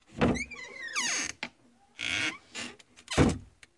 Door opening and closing sound. Shutting a door.
opening, sound, shutting, Door